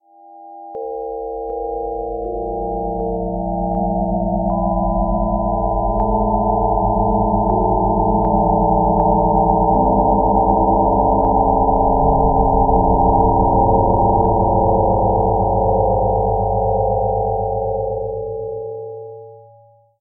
death sound1
horror-effects, horror-fx